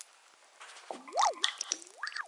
Water sound collection
drip, water, drop, splash, wet, hit